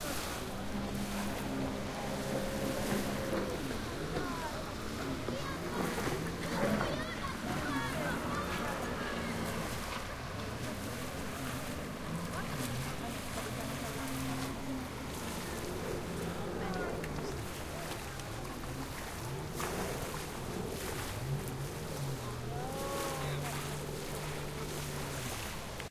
Water wave Beach Peoples ships Field-recording 200815 0038
Water wave Beach Peoples ships Field-recording
Recorded Tascam DR-05X
Edited: Adobe + FXs + Mastered